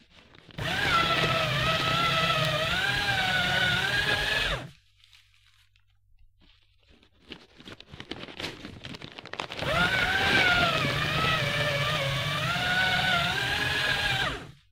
my Hama CC 614L shredding two sheets of paper, with paper rustling
paper, shredder, trashcan